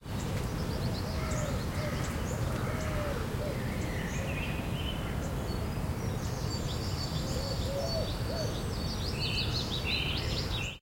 birds short Olympus LS3 Vögel kurz
LS3, field-recording